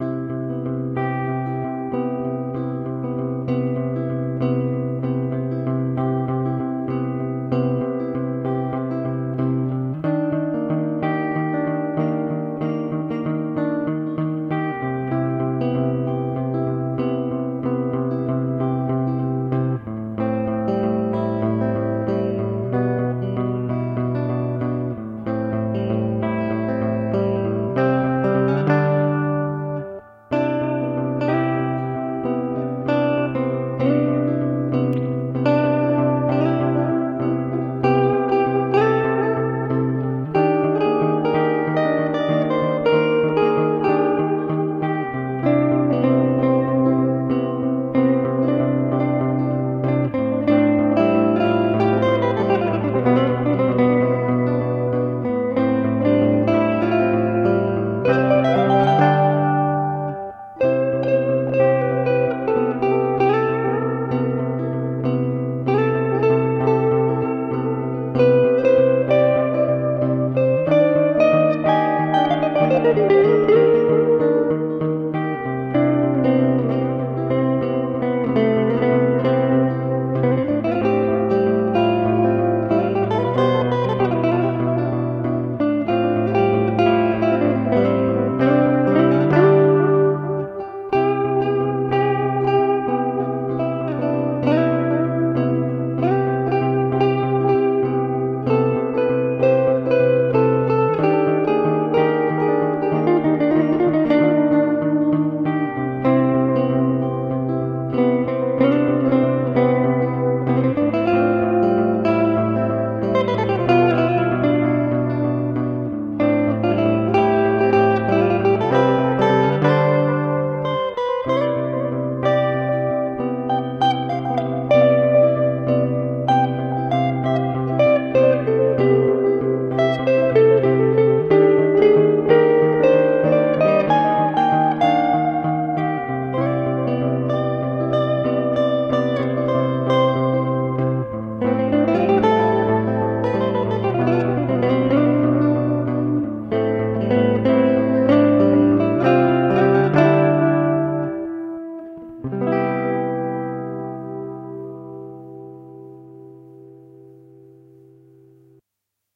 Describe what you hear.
Guitar Loop performance (95Bpm)
this is my home-performation guitar loop, where I playd on my old guitar Musima Record 17
Bpm - 95
kay - Cmaj.